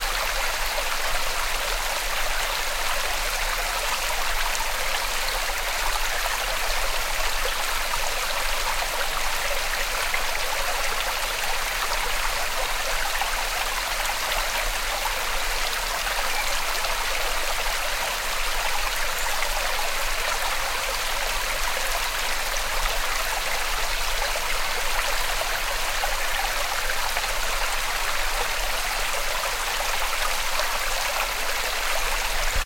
Forest stream New Zealand 02
Forest stream New Zealand
water, field-recording